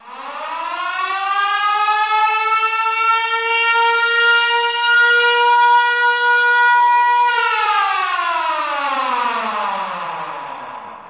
military alarm
war, military